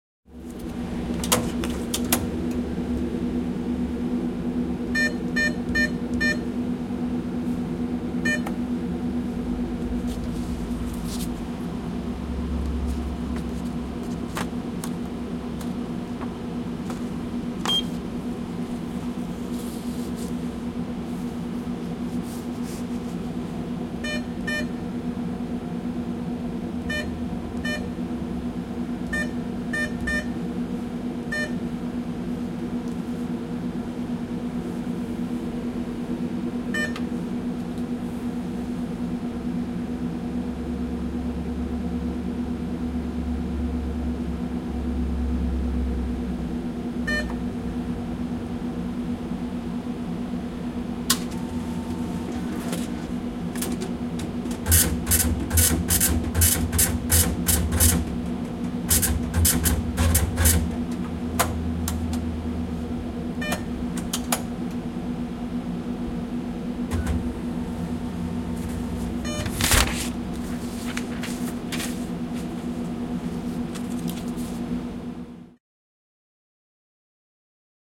ATM (automated teller machine) // Pankkiautomaatti
Paying a bill with barcode using ATM. Beeping, printing receipt.
Lasku maksetaan viivakoodilla pankkiautomaatilla, piipityksiä, kuitin tulostus, laitteen loksahduksia, lähiääni.
Date/aika: 1995
Place/paikka: Vihti
automated-teller-machine, field-recording, finnish-broadcasting-company, kuitin-tulostus, pankkiautomaatti, piipitys, printing-receipt